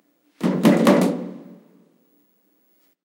Metal Hit
punshing metal plate
impact, Hit, Tool, Boom, hitting, Crash, punsh, Metal, clong